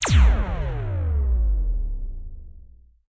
blaster shot 6(Sytrus,rsmpl,multiprcsng)single
Sounds of shots from sci-fi weapons. Synthesis on the Sytrus synthesizer (no samples). Subsequent multi-stage processing and combination of layers. Almost all of the serial shot sounds presented here have a single option (see the mark at the end of the file name), so that you can create your rate of fire, for example using an arpeggiator on one note. At the same time, do not forget to adjust the ADSR envelopes, this is very important in order to get the desired articulation of a series of shots. Single shots themselves do not sound as good as serial shots. Moreover, it may seem that the shots in the series and single, under the same number do not correspond to each other at all. You will understand that this is not the case when setting up your series of shots, the main thing, as I said, you need to correctly adjust the ADSR. May be useful for your work. If possible, I ask you to publish here links to your work where these sounds were used.